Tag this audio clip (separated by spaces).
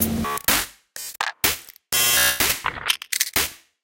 Abstract Loops Percussion